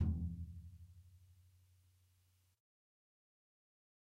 Dirty Tony's Tom 14'' 004
This is the Dirty Tony's Tom 14''. He recorded it at Johnny's studio, the only studio with a hole in the wall! It has been recorded with four mics, and this is the mix of all!
14, 14x10, drum, drumset, heavy, metal, pack, punk, raw, real, realistic, tom